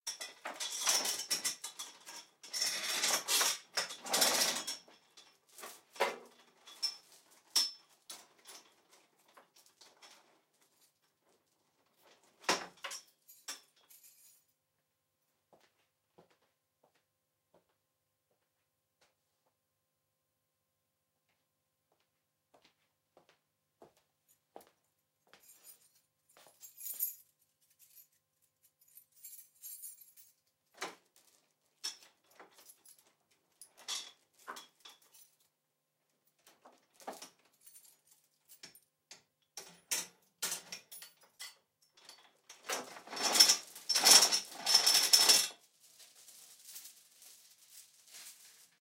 Padlock Chain Lock Unlock
Heavy chain, padlock pulled through metal gate, unlocked. Keys rattle, footsteps, locked again, chain rattle.